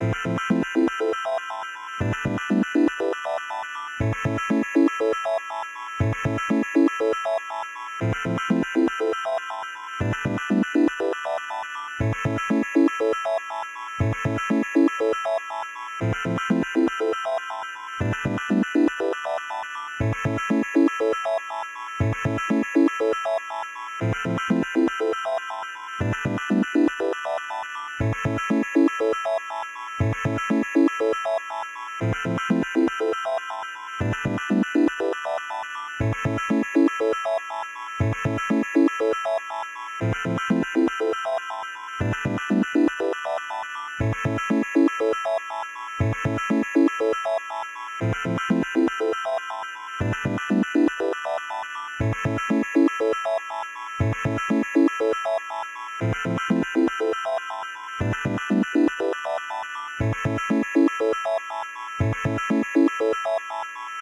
8 bit game loop 001 only organ long 120 bpm
game, nintendo, 8-bits, electronic, 120, sega, beat, gameloop, gameboy, 8, 8bit, bit, 8-bit, drum, synth, loops, bpm, bass, loop, gamemusic, free, mario, electro, music